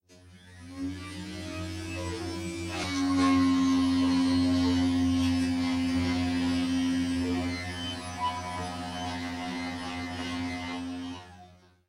MODRZYK Léna 2017 2018 UFO
This sound is a mix of analog sound and digitally created sound. I recorded the sound of a coffee machine. Then on Audacity, I generated a tone and modified its envelope. I changed the levels, added effects as reverberation and echo. I put melted at opening and closing in purpose to pretend something was taking off and landing. At first I wanted to create the atmosphere of a spaceship. But after that it looked more like a vacuum noise. I tried to modify amplification and added again echo and reverberation to make it sound more like a weird UFO.
Code selon la typologie de Schaeffer : V
Précisions morphologie :
Masse : Son cannelés
Timbre : terne
Grain : Rugueux
Allure : Chevrotement
Dynamique : L’attaque du son est graduelle
Profil mélodique : Variations serpentines
Profil de masse : site
landing, airplane, space-craft, ufo, lift-off